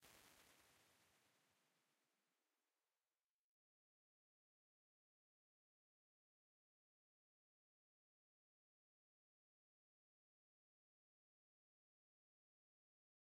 Quadraverb IRs, captured from a hardware reverb from 1989.